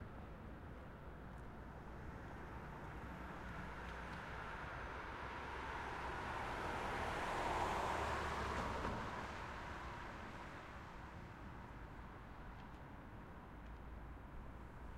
Vorbeifahrendes Auto von rechts nach links
Ein von rechts nach links vorbeifahrendes Auto. / A car moving from right to left.
drive, car, right-to-left, engine, berlin, driving, automobile, vehicle, auto